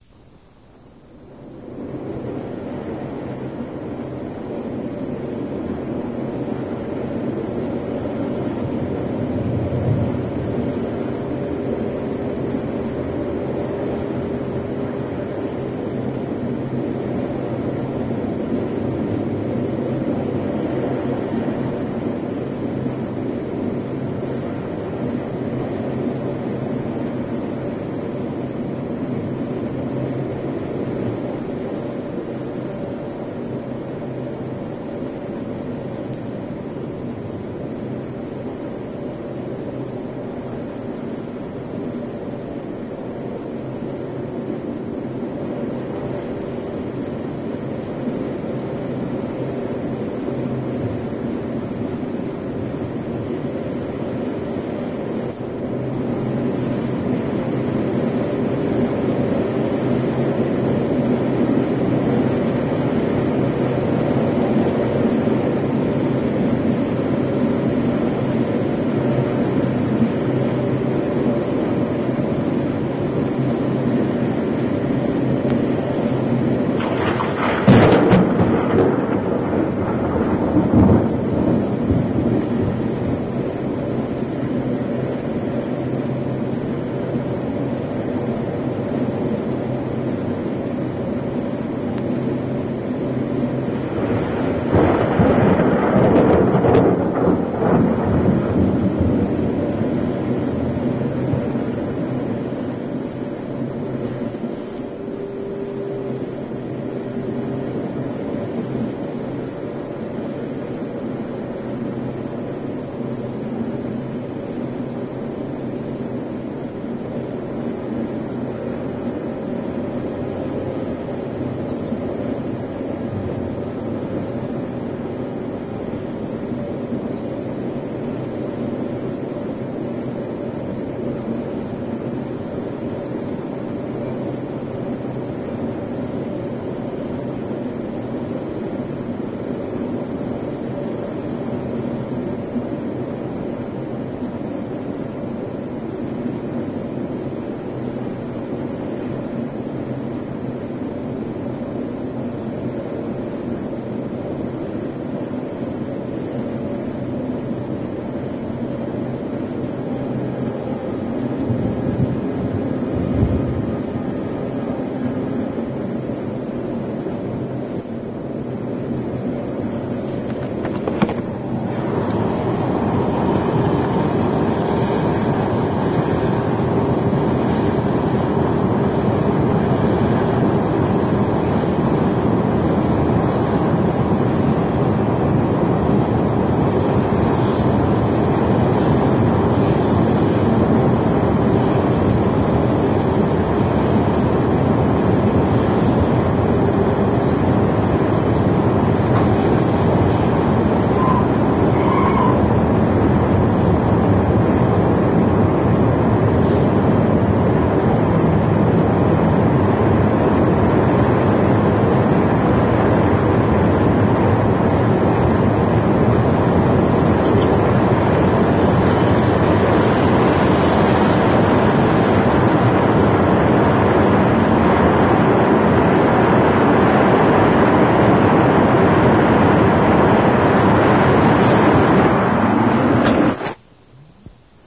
A recording of a tornado that hit Xenia, Ohio in the late '70's during a major tornado outbreak. The recording is over 3 min. long, and was recorded by a lady who was in the path of this major storm. The roar you hear is from 2 F-5 tornado's rotating around each other! Awesome recording!